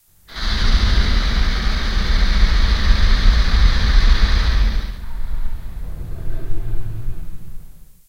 This is a sustained spray of household air freshener, with the pitch lowered several steps in audacity and with a full dose of gverb. To me it sounds like some huge gas vent opening and closing. But really it's just a spray can being used and then the cap being put back on LOL!